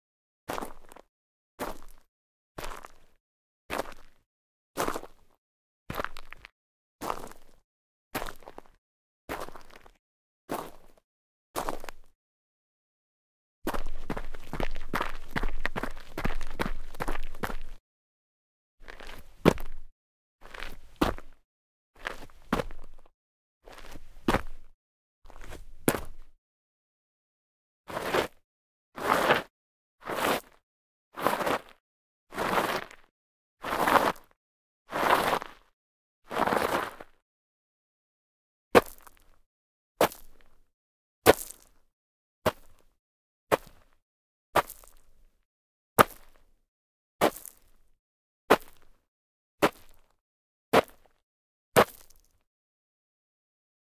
Footsteps Mountain Boots Gravel Mono
Footsteps sequence on Gravel - Mountain Boots - Walk (x11) // Run (x10) // Jump & Land (x5) // Scrape (x8) // Scuff (x12).
Gear : Rode NTG4+